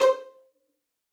One-shot from Versilian Studios Chamber Orchestra 2: Community Edition sampling project.
Instrument family: Strings
Instrument: Violin Section
Articulation: pizzicato
Note: C5
Midi note: 72
Midi velocity (center): 95
Microphone: 2x Rode NT1-A spaced pair, Royer R-101 close
Performer: Lily Lyons, Meitar Forkosh, Brendan Klippel, Sadie Currey, Rosy Timms
c5, midi-note-72, midi-velocity-95, multisample, pizzicato, single-note, strings, violin, violin-section, vsco-2